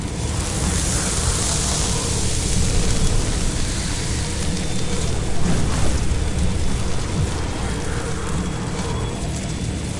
ENERGY TEXTURE (Looping)
A looping clip of a flaming energy sound. Could be a plasma battery or a spaceship's internal engine. Or whatever.
ambiance, energy, fire, texture